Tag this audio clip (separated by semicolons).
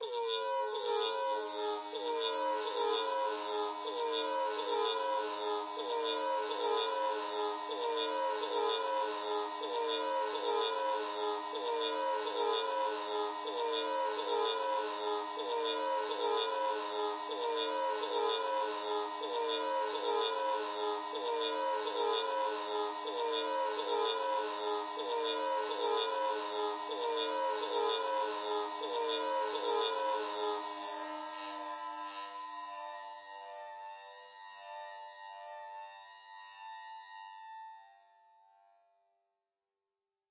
sounds; packs; vsti